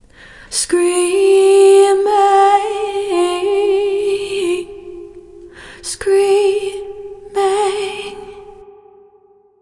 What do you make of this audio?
Me singing "screaming". Compression, eq adjustments and reverb added. 90bpm 4/4 time.
Thank you for remembering to credit to Katarina Rose in your song/project description. Just write "vocal sample by Katarina Rose" in the project description. It's as easy as that!
Recorded in Ardour, using a t.bone sct-2000 tube mic, and edirol ua-4fx recording interface. Added compression, reverb, and eq adjustments. Any squeaking sounds present are only on the streamed version; the downloadable clip is high quality and squeak-free.